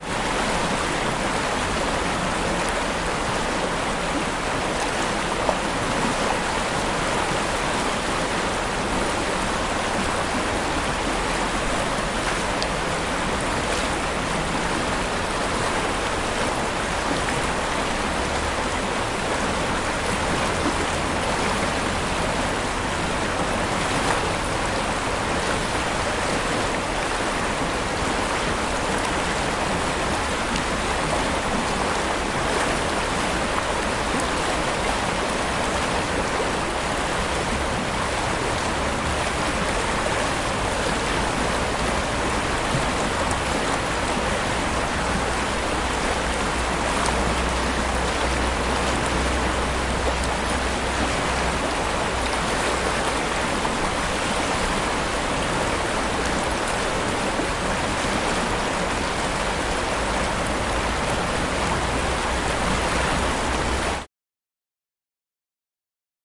River Maira - Savigliano #03
3rd of 3 records made more or less in the middle of the Maira river in Savigliano (CN) - Italy with more traffic noise in the background.
river, field-recording